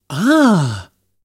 Ahhh Surprise Man Voice
A man is surprised. Check out my other sounds if you need more expressions.
ahh, ahhh, animation, expression